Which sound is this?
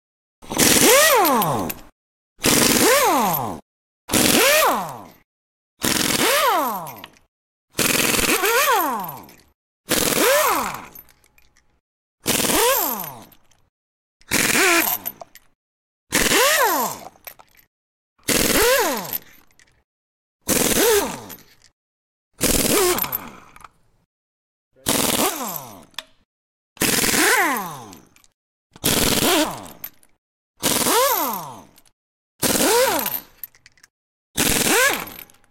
Air PowerTool
Some air gun samples I've recorded in a body shop.
Recorded with a sennheiser me66 to a sounddevices 722